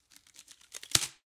Recording of some sort of wood (probably cottonwood) being pulled apart. Has a lot of natural room reverberation, but at lower level. The stereo imaging seems unstable. Was originally recorded for smashing sound effects for a radio theater play. Cannot remember the mic used, perhaps SM-58, or a small diaphragm condenser; but it probably went through a Sytek pre into a Gadget Labs Wav824 interface.
clean, tear, wood